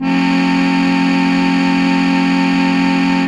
An old brown plastic Wind Organ (?)-you plug it in, and a fan blows the reeds-these are samples of the button chords-somewhat concertina like. Recorded quickly with Sure sm81 condenser thru HB tube pre into MOTU/Digi Perf setup. F Major.
organ, plastic, electric, wind